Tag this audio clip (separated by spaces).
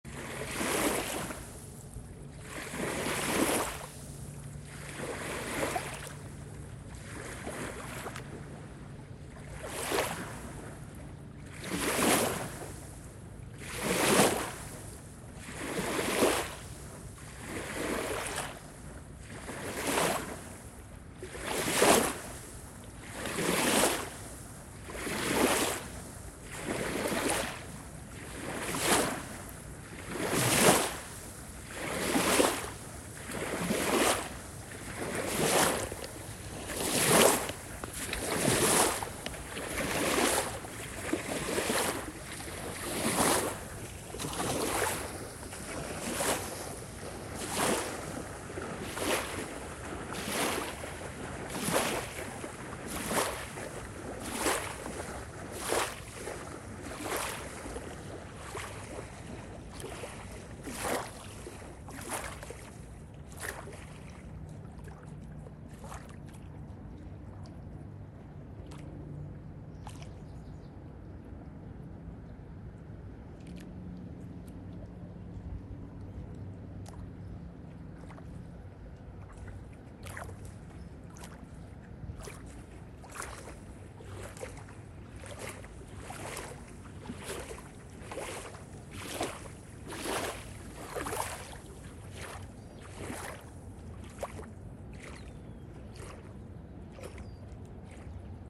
beach breaking-waves coast coastal crashing field-recording ocean relaxing river sea seaside shore sound-of-water splash splashing surf water water-crash Water-sound wave waves